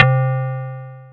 FM1-FMBell 04
This sound was created using Frequency Modulation techniques in Thor (a synth in the Reason DAW).
percussion, frequency-modulation, synth, collab-1, FM, perc, synthesized